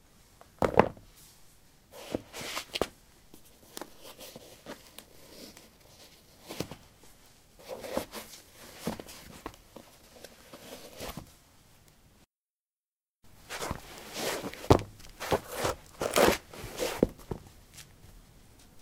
paving 13d sportshoes onoff
Putting sport shoes on/off on pavement. Recorded with a ZOOM H2 in a basement of a house: a wooden container filled with earth onto which three larger paving slabs were placed. Normalized with Audacity.
footstep, footsteps